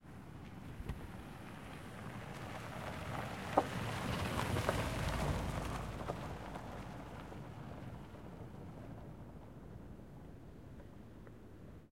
Car on dirt track
car drive exterior, wheels on dirt track, recorded using zoom h4n, stereo
car, dirt, drive, track, wheels